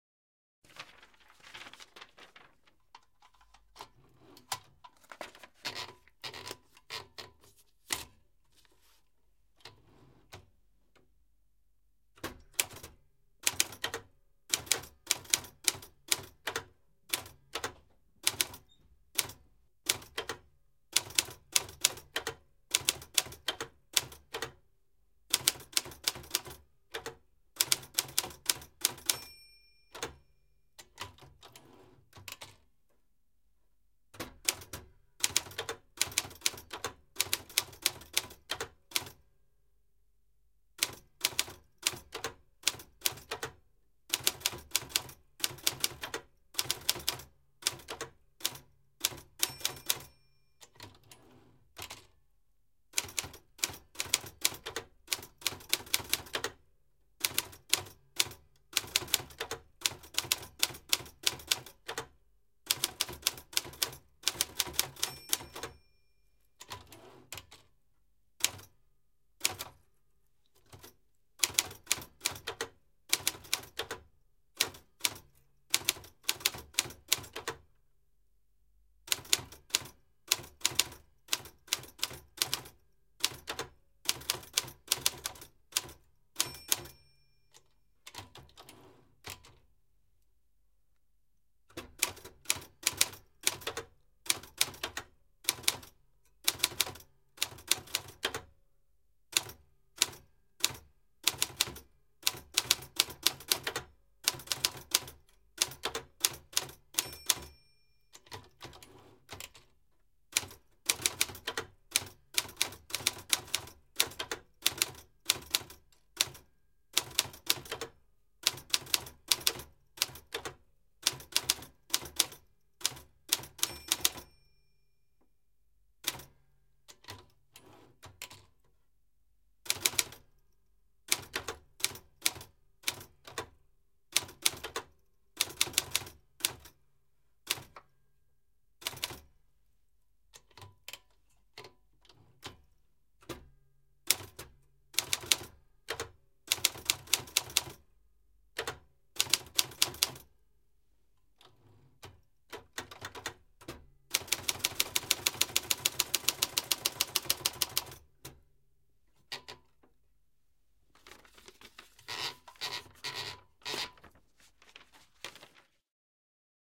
Kirjoituskone, vanha, mekaaninen / An old manual typewriter, 1970s, typing, bell at the end of the line, typewriter carriage return by hand, manual paper roll (Triumph Matura 300)
Kirjoituskone Triumph Matura 300 1970-luvulta. Paperi rullataan koneeseen, kirjoitusta. Kello, pling, ilmoittaa rivinvaihdosta, vaihto käsin. Lopussa paperi rullataan pois koneesta.
Paikka/Place: Suomi / Finland / Helsinki
Aika/Date: 19.06.2000